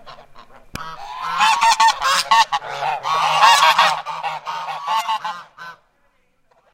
cibolo geese04
Geese honking at Cibolo Creek Ranch in west Texas.
animal, geese, honking